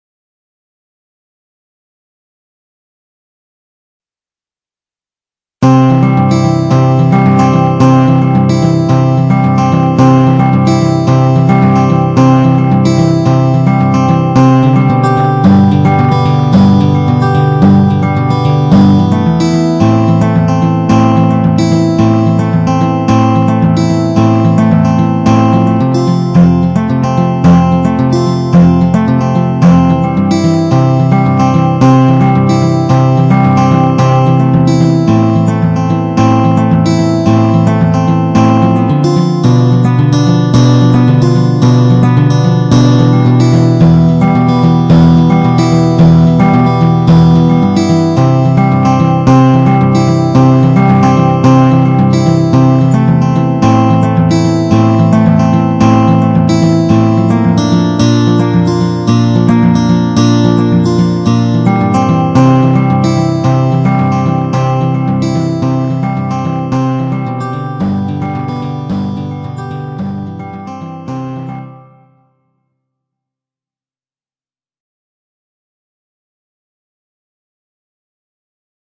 ONE thing cafe scene - guitar
Created this excerpt for my film "ONE thing". Chirpy guitar is used to create an odd vibe. Very lovely imo.
NOT a complete piece but a nice sound-bite? Created in Garage band at 120bpm.
1. Don't claim it for yourself by claiming you created it - that's just rude!
Or nothing like that. It's ok.
I just would like Max Riley Tennant credited too, if you wanted to.
I don't really mind :) AND ONLY IF YOU WANT TO :) Enjoy!
and if you want to see the short film I created it for: ONE thing then click here.
Please be advised though -it is a potentially challenging subject matter, and I've been interviewed by the police for it already, so don't watch it if films about mortality and the ephemeral nature of life may upset you?